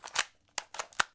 GBC Reload 03
Taking a cartridge in and out of a Gameboy-Colour to emulate a handgun reload sound.
handgun, magazine, weapon